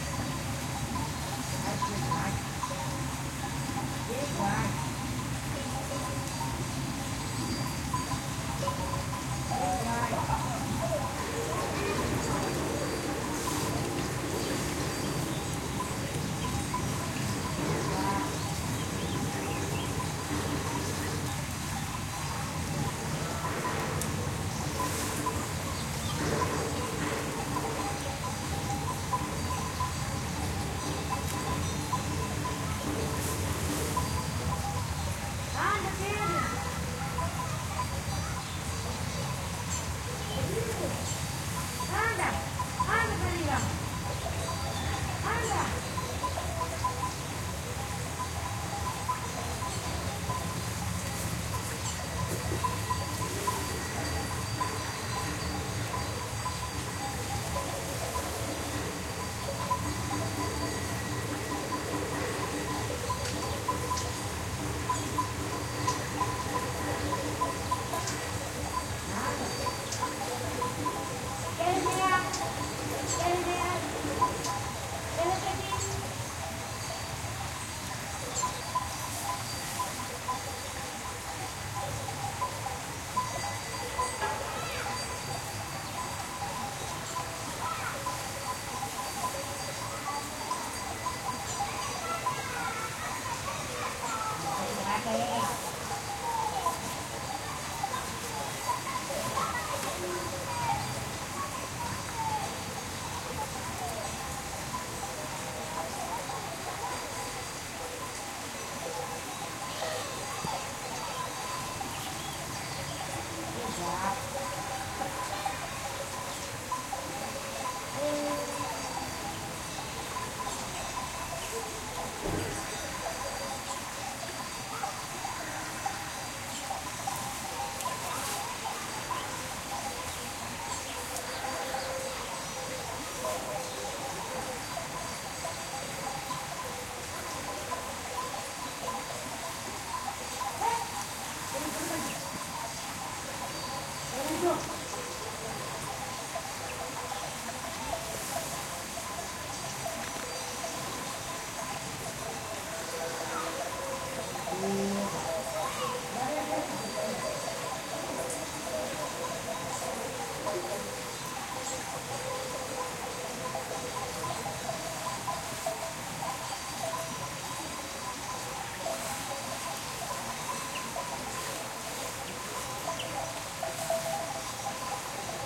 Sheep returning to their enclosure in the afternoon, with some adult and kid voices. Recorded on the smallest international bridge in Europe (3 m), located at El Marco, a tiny village on the Spain-Portugal border. EM172 Matched Stereo Pair (Clippy XLR, by FEL Communications Ltd) into Sound Devices Mixpre-3 with autolimiters off.